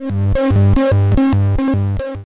8 bit SFX generated in SFXR.
8, bit, sample, SFXR